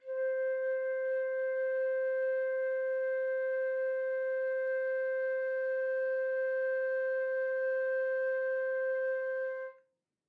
One-shot from Versilian Studios Chamber Orchestra 2: Community Edition sampling project.
Instrument family: Woodwinds
Instrument: Flute
Articulation: non-vibrato sustain
Note: C5
Midi note: 72
Midi velocity (center): 31
Microphone: 2x Rode NT1-A spaced pair
Performer: Linda Dallimore